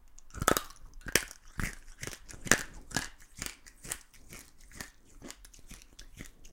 Me eating a carrot.
Recorded with a MXL 990 condenser mic.
yum, foley, crunching, crunch, eat, eating, carrot